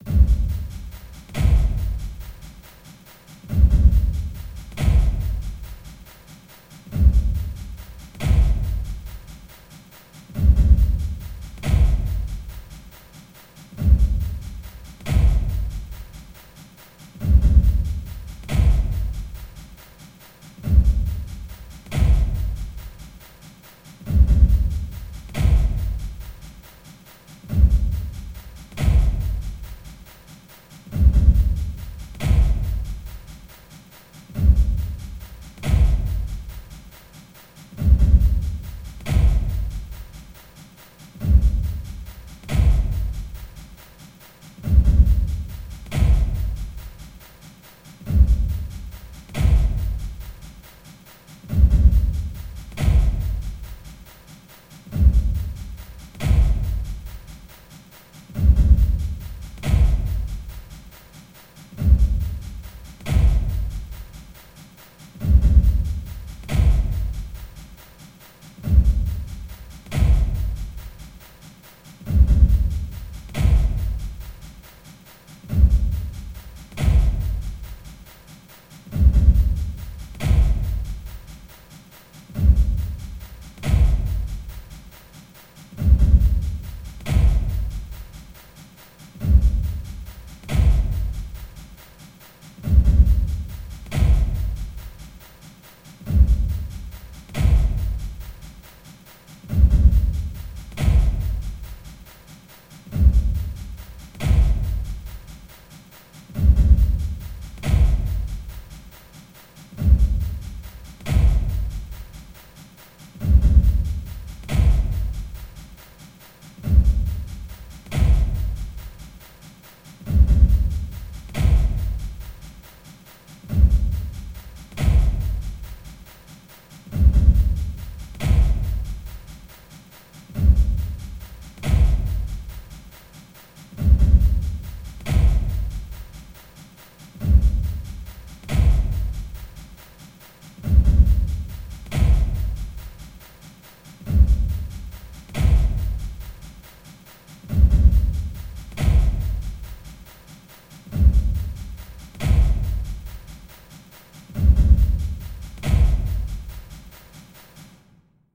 The Washing Machine [Drum Loop]
Drum loop that sounds like a washing machine or a dryer with heavy clothes in it!
beat, drum, loop, dryer, drums, percussion, washing-machine, bpm, drum-loop, laundry, rhythm